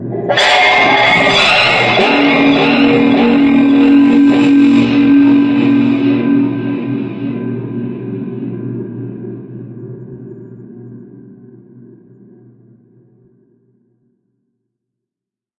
a lofi recording of my banjo, processed in ableton live